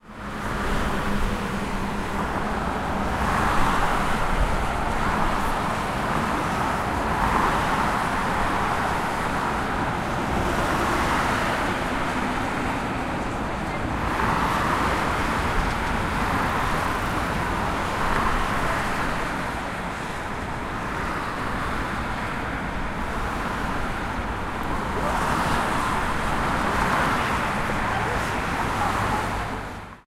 Traffic in the road below Mapo bridge.
20120616